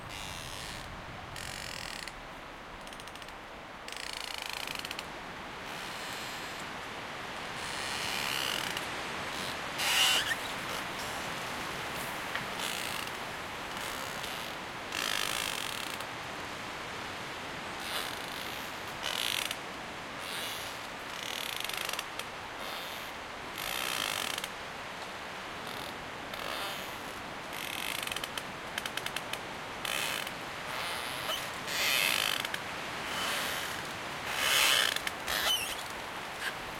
Wind Through Trees 3a
Recorded on a windy day in Thrunton woods, Northumberland, UK.
Zoom h2n, recorded near a tree that was falling over and rubbing against other trees. Same location as 'Wind Through Trees 1' but with recorder attached to boom and closer to the creaking sound source. It has also gone through some light processing in RX3 to clean boom sound from muscle tension.
You can hear:
- Wind
- Wood creaking and Squeaking
- Leaves rustling